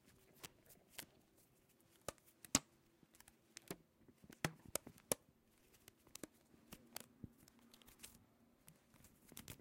elastic hair band snapping
snapping, tie, hair, elastic